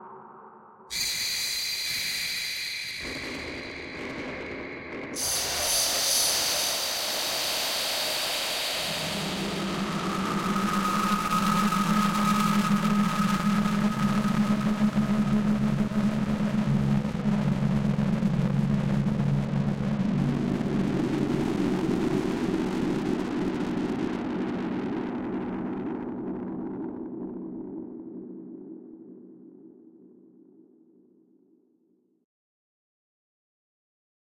psycho texture
A most thrilling texture
joltin'joe's
drone, horror, thriller